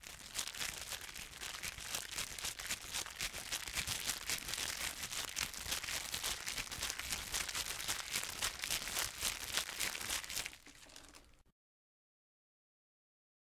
bolsa de mini chips.
arrugando una bolsa o paquete de mini-chips
wrinkling a minichips package.
arrugar, mini-chips, estudio, dmi, audio-technica, interactivos, icesi, cali, medios, diseo, bolsa, arrugando